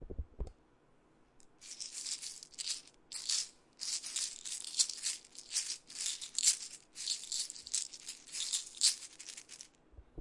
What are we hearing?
Pill Bottle Shaking
This is the sound of pills in a bottle rattling.
rattle, drugs, pills